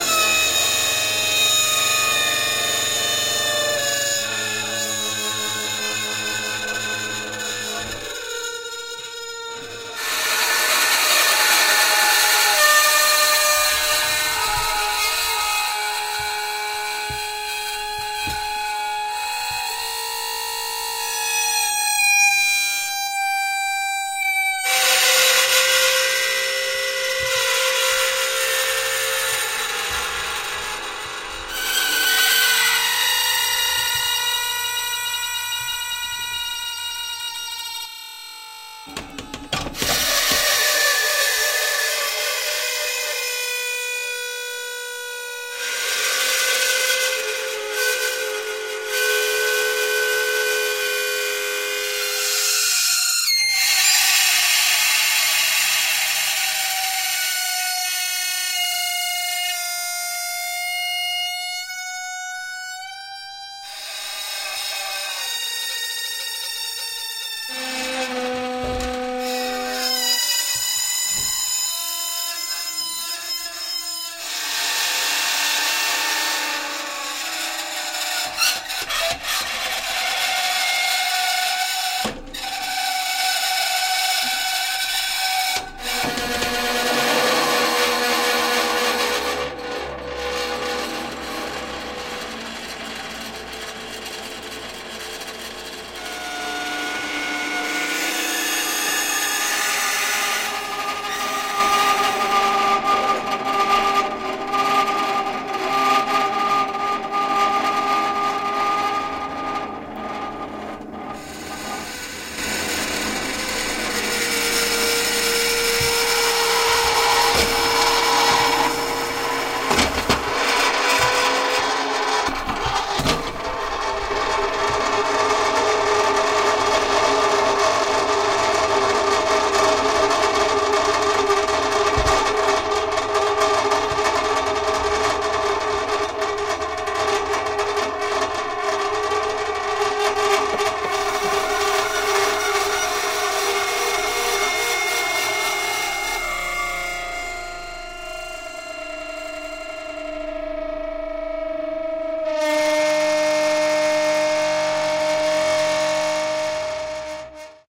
I noticed there were no dry ice on oven rack sounds here so here is a recording of dry ice interacting with a steel oven rack. As the dry ice melts it creates vibrations on the metal that evolve as the material travels across the surface. It is an awful sound resembling a combination of saxophone, violin, bagpipe and screaming (any species).
This was recorded on Halloween 2018. It was a tradition in my family when I was a kid to get some dry ice on Halloween and fill beakers with colored water to surround the candy display. The addition of dry ice on oven rack sound effects developed later and truly scared a lot of kids because it is so loud and terrible. These days we make some dry ice kief as well which makes the holiday even more festive and weird.